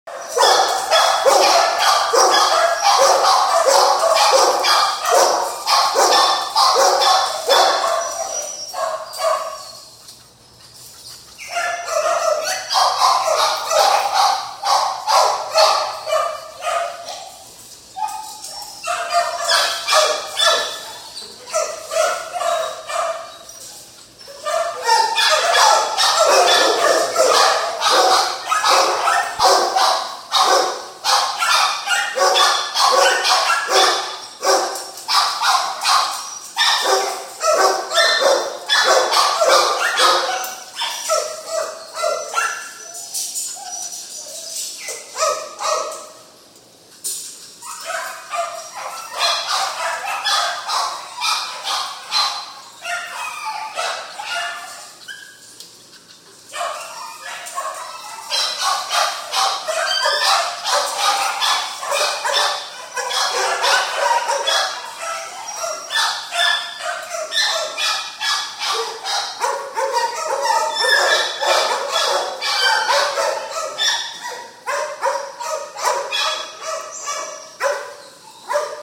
recorded at a kennel with many dogs. The building has a metal roof and concrete walls.